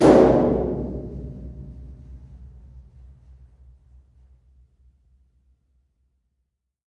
One of a series of sounds recorded in the observatory on the isle of Erraid
field-recording, hit, resonant